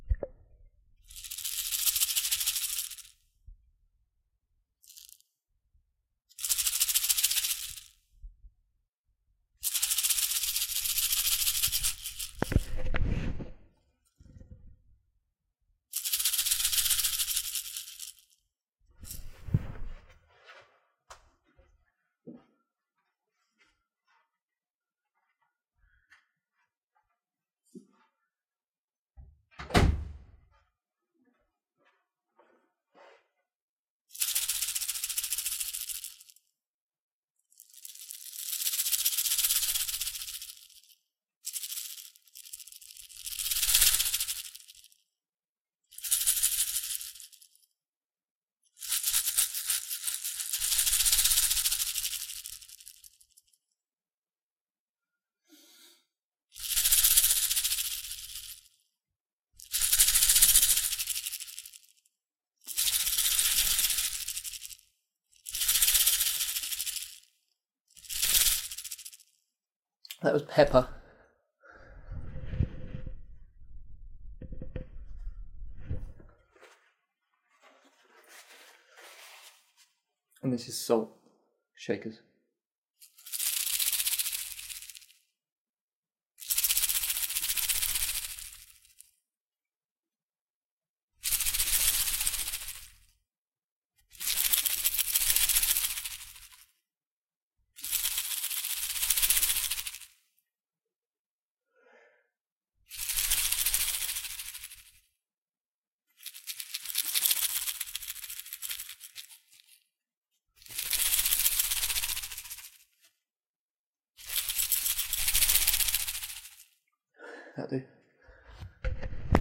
I needed a rattle-snake sound for a tracklay so recorded myself shaking my salt and pepper grinders. Considering I recorded this on a stereo mic in the bedroom of my two-bed flat, I think it came out pretty well!
This is the processed version, which has been through noise reduction and high-pass filtering (soft cut off begins somewhere around 200Hz).